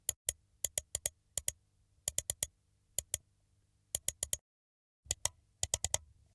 Mouse clicking 004
High quality recording of a computer mouse...
click, clicking, clicks, desktop, mouse, sfx, sound